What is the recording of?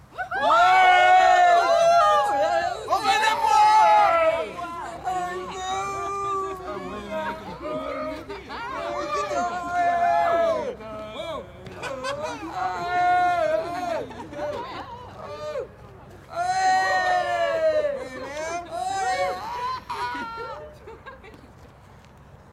Group of people - Cheering - Outside - 03
A group of people (+/- 7 persons) cheering - exterior recording - Mono.